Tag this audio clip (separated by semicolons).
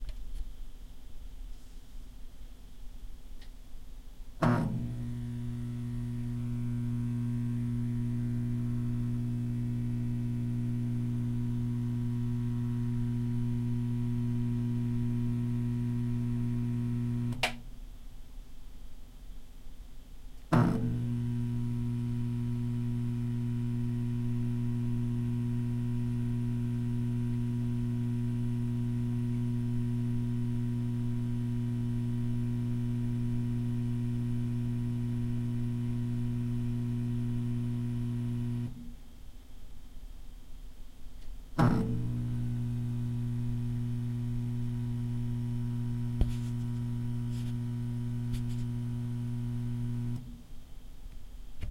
electricity; electric; buzz; light; power; hum